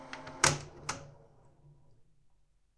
20 FAST FORWARD STOP
Recording of a Panasonic NV-J30HQ VCR.
cassette, loop, pack, recording, retro, tape, vcr, vhs